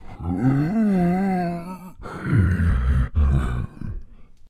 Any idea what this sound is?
Just a moaning zombie.
growl, horror, undead